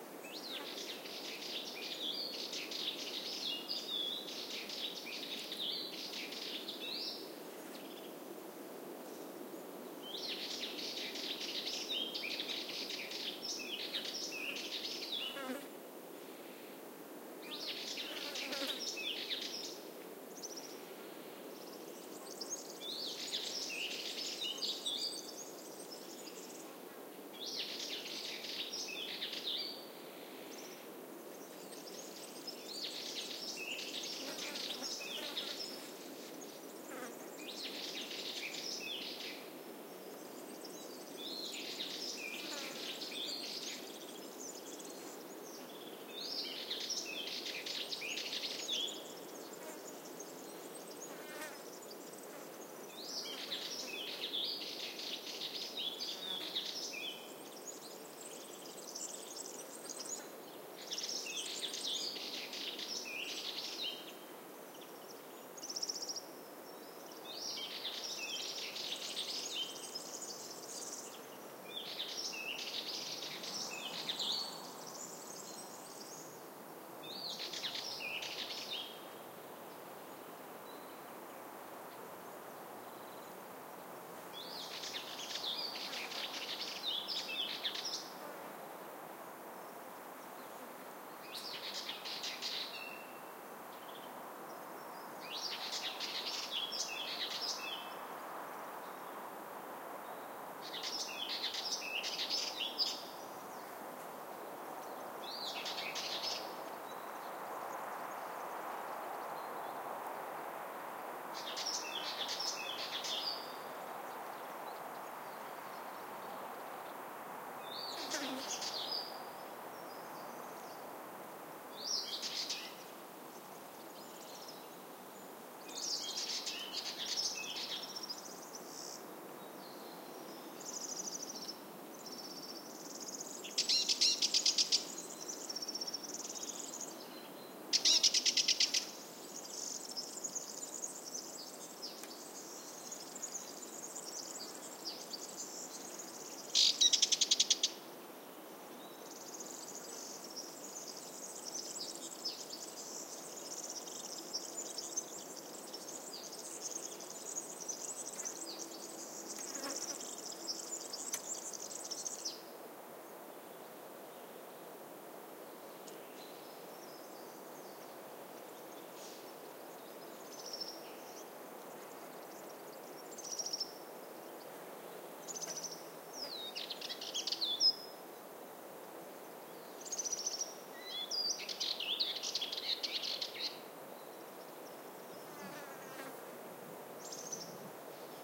20080528.forest.wind.serins
Pine forest ambiance in spring. Birds (Serin; EDIT: this should be Sylvia borin, according to Reinsamba - but I can swear I saw many Serins all around the place :-), breeze on trees and insects flying. Serins are tiny birds that move in groups and have a high-pitched call that sounds 'aggressive' to me. Anyway, they remind me of children having a quarrel about something. Sennheiser MKH30+MKH60 into Shure FP24 and Edirol R09 recorder. Recorded near Las Pardillas (Hinojos, S Spain) around 12AM
birds, field-recording, forest, nature, serin, south-spain, spring